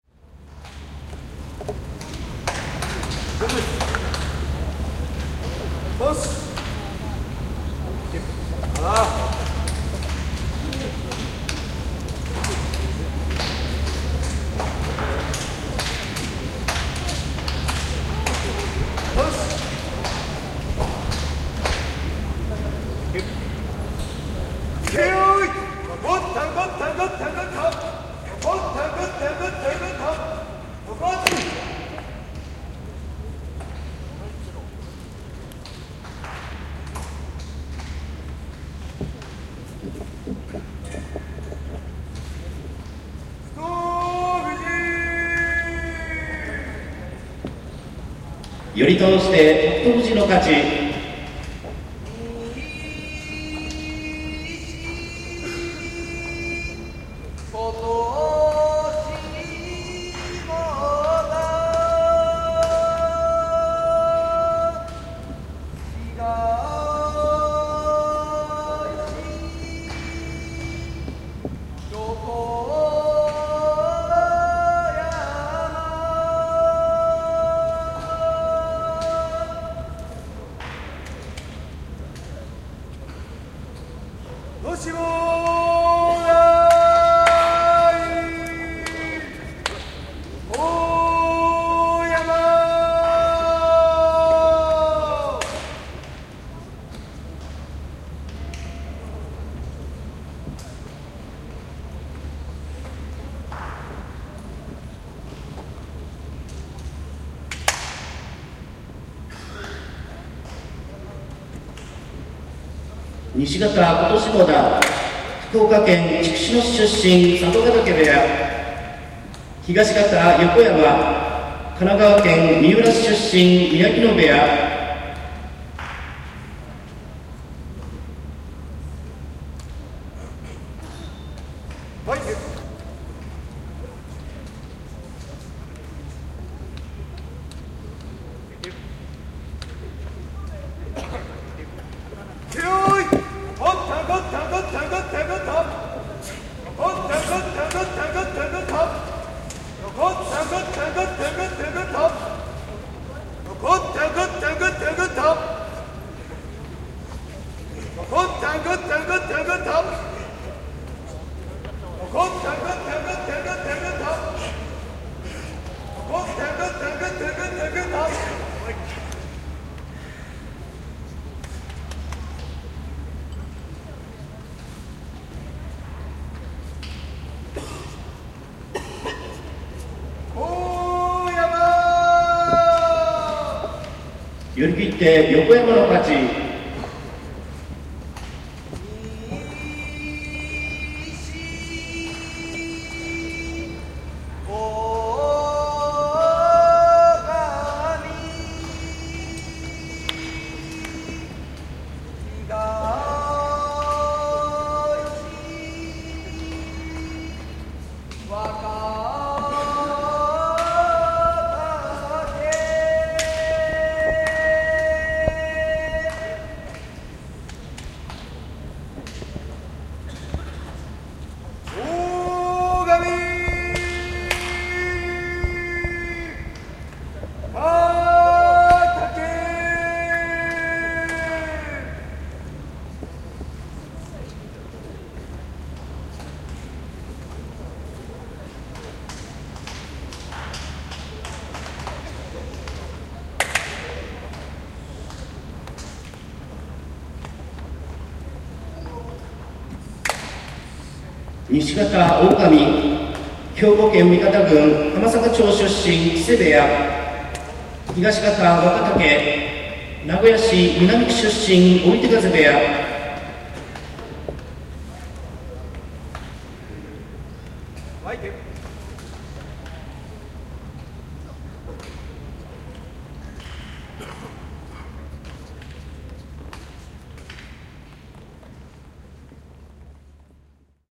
The sounds of Sumo (ritual cries and body contact) recorded at Ryogoku Kokugikan Stadium, Tokyo Japan.
mini-disc
bout, dohy, fat, field-recording, full-contact, gy, Japan, Japanese, Japanese-language, ji, Kokugikan, mawashi, Nippon, rikishi, Ryogoku, sport, sports, sumo, sumo-wrestling, Tokyo, traditional, wrestle, wrestling